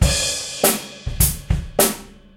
A short recording, crash and then 1/4 hi-hat hiphop style beat. Loopable.
Recorded using a SONY condenser mic and an iRiver H340.